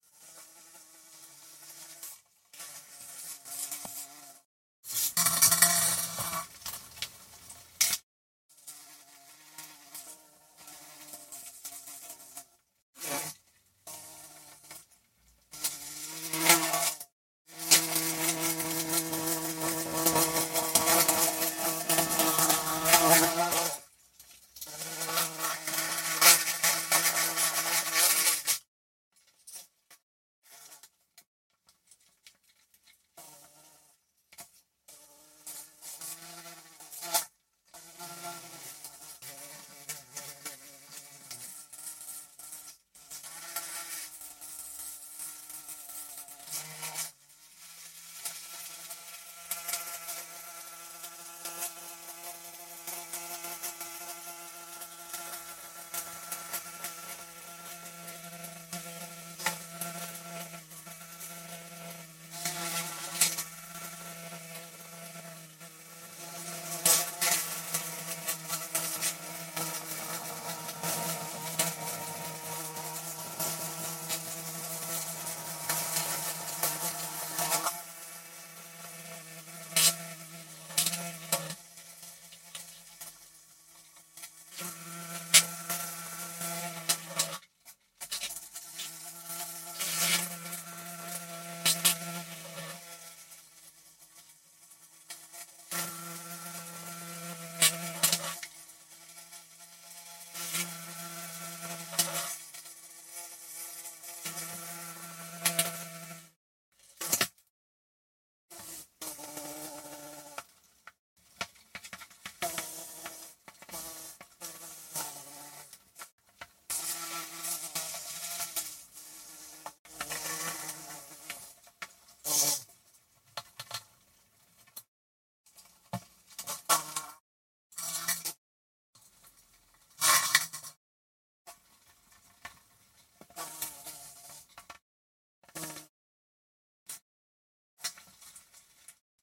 Some bumblebees catch in a glasbox and record the bumble sound inside with a (Panasonic) Ramsa S3 Microphone
BUMBLEBEE, FLY, BEES, BEE